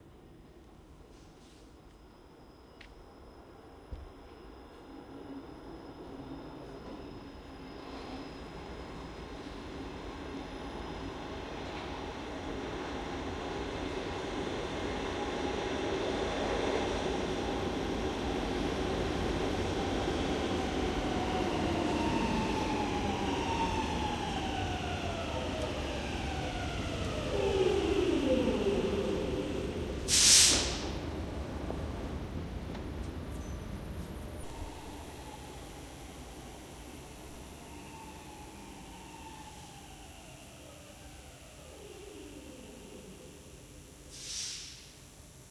metro entering the station
metro train comes to station
..recorded with 2 Sennheiser MD 441 mics